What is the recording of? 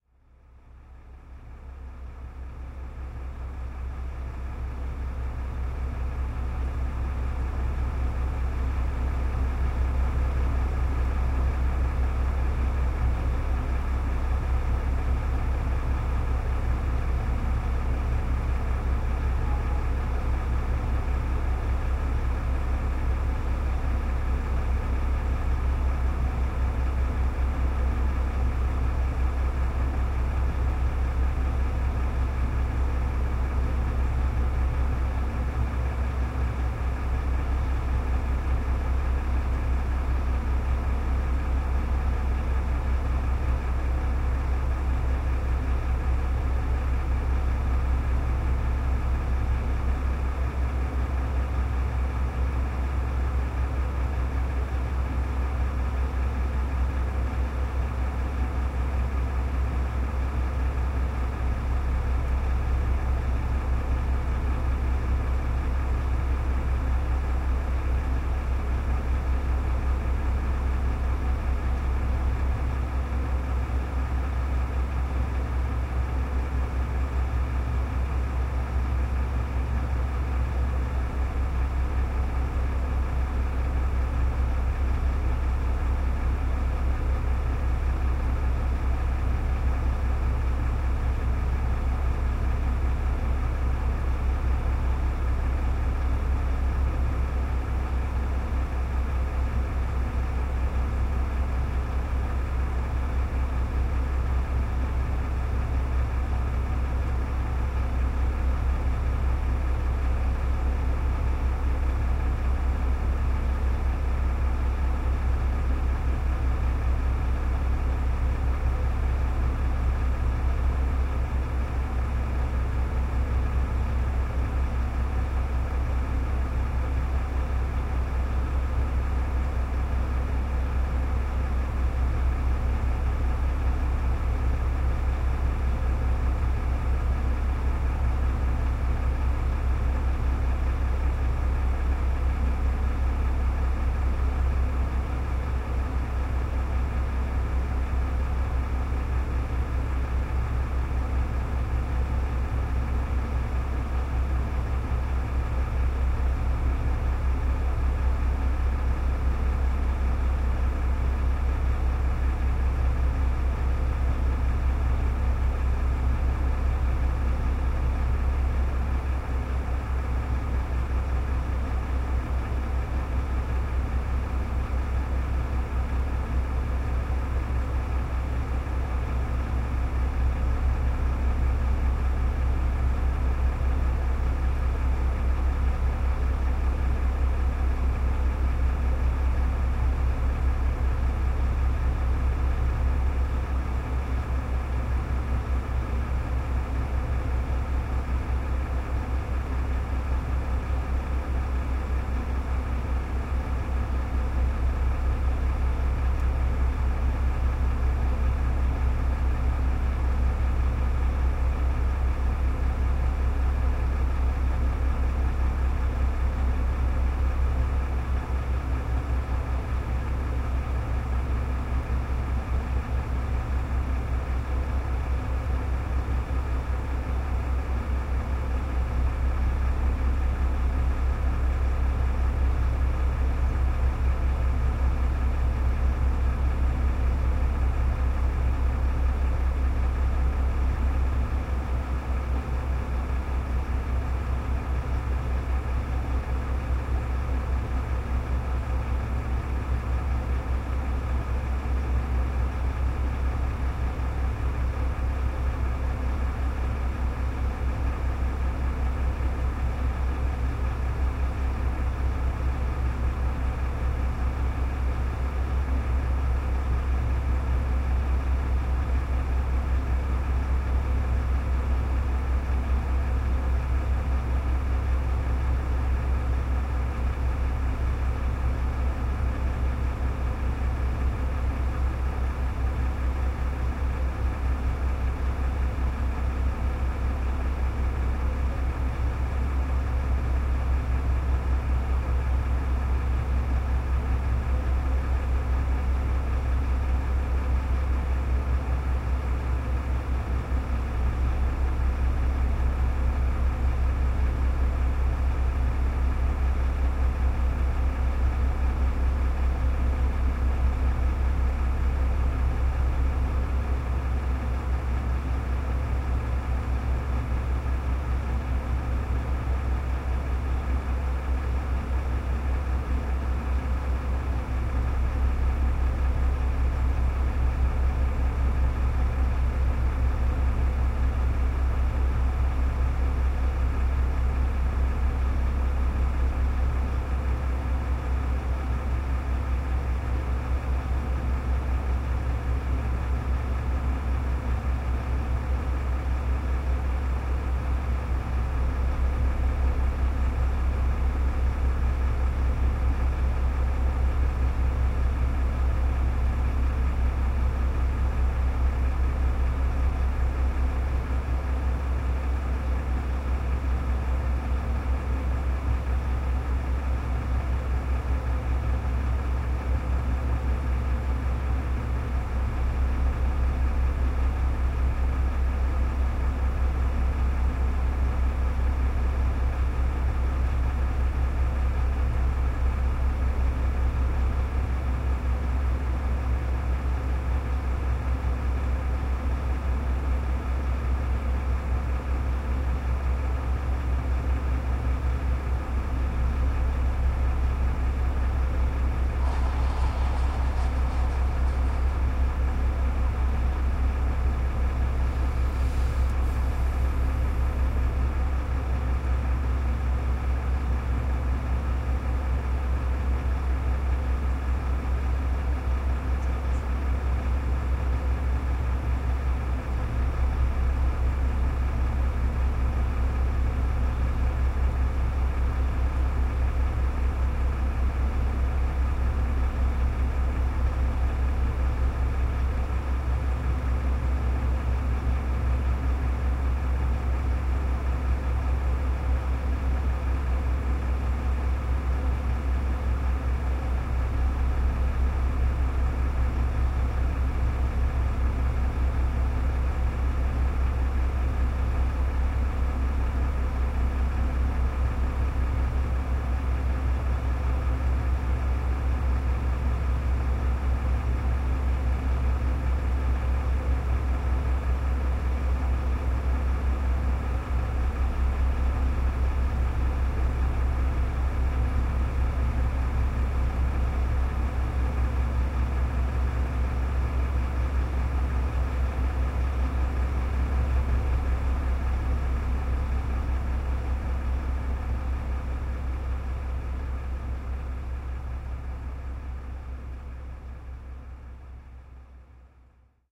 110806-whirr of recharging2
06.08.2011: seventh day of ethnographic research about truck drivers culture. second day of three-day pause. Oure in Denmark, fruit-processing plant. sound of recharging truck.
denmark, drone, engine, field-recording, noise, oure, recharging, whirr